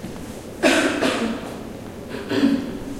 somebody coughs twice. Olympus LS10, internal mics